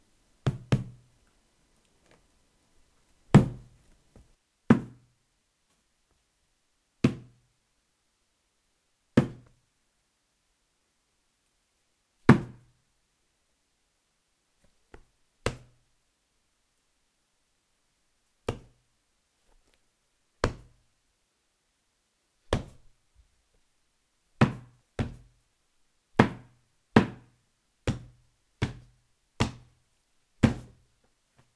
Barre metal sur tissu + meuble
Hit with a metal bar on a cloth whose on wood for imitate a choc on a head
Recorded with a TBones SC440
choc, cloth, hard, hit, tap